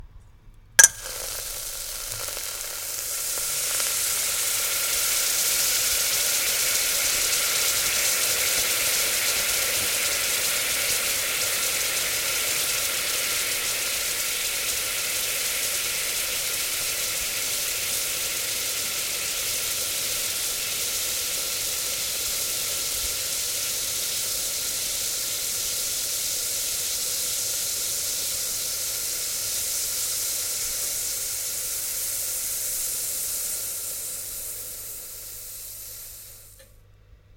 Water evaporating once dropped onto a hot pan - take 6.